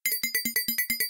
Another little wiggle jiggle. Xylophone. spoons - Created 14.1017 Internal synthesiser garage band iMac. Bells synth percussion.